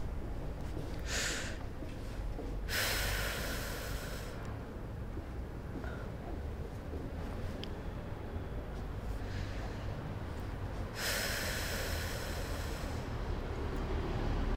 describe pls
cigarette breathing exhalation smoke